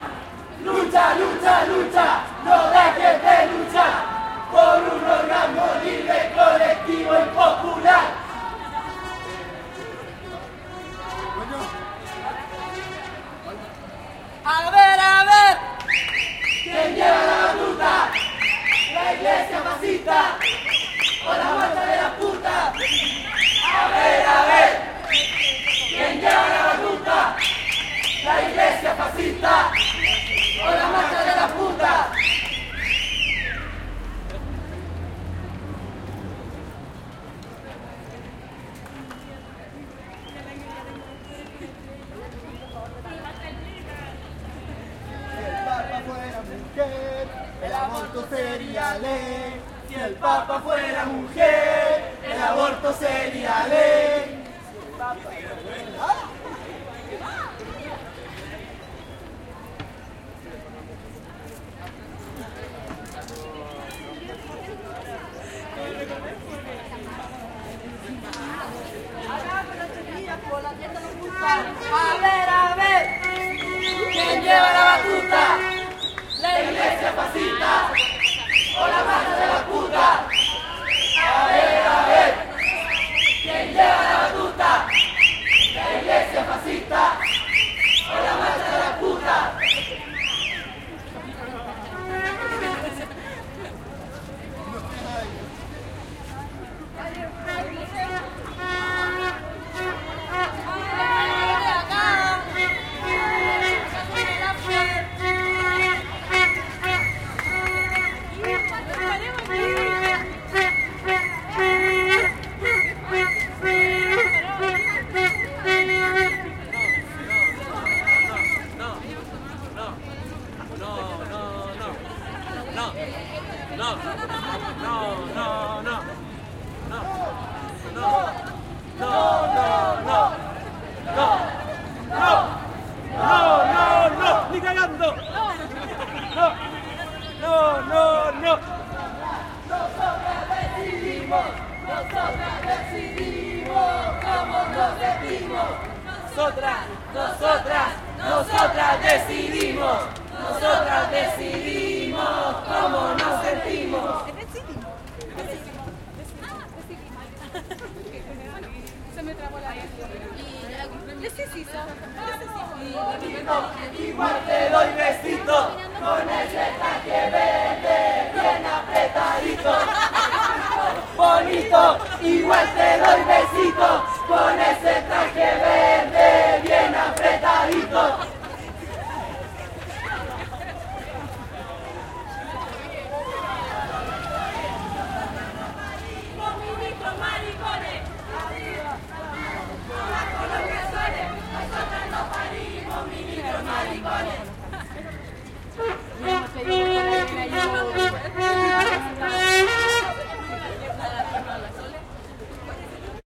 marcha de las putas y maracas 05 - gritos orgasmicos
Lucha por un orgasmo libre, colectivo y popular. A ver quien lleva la batuta, si el papa fuera mujer.
Trutruca presenta no, no. No, no, no, no. Nosotras decidimos como nos vestimos. Arriba las manos, abajo los calzones.
protest, santiago, silvestri, calle, gritos, leonor, putas, marcha, maracas, crowd, street, chile, protesta